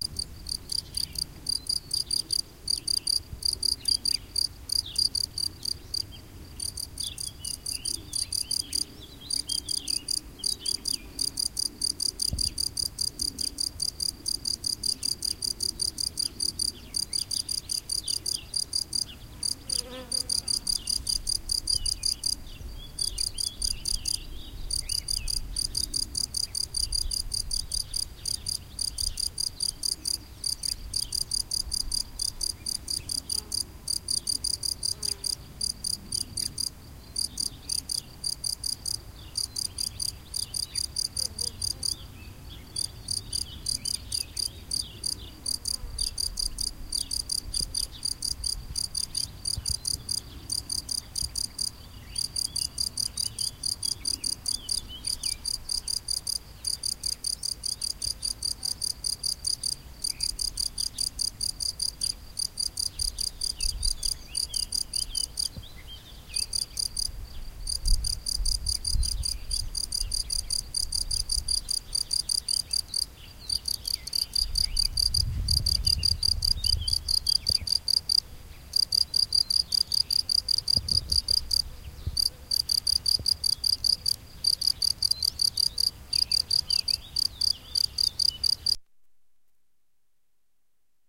grillon-drome-mai-2008
some "grillons" as we call them in france, recorded in may 2008. the delicious sound of spring in provence.
field, fieldrecording, france, grillons, outdoor, provence, recordings